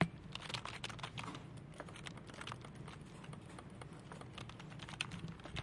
Sound Description: Mausklicken und Tastaturtippen - Clicking of mouse and Keyboard
Recording Device: Zoom H2next with xy-capsule
Location: Universität zu Köln, Humanwissenschaftliche Fakultät, Gebäude 213, Computerraum
Lat: 50°56'1"
Lon: 6°55'13"
Date Recorded: 18.11.2014
Recorded by: Jonas Ring and edited by Vitalina Reisenhauer
2014/2015) Intermedia, Bachelor of Arts, University of Cologne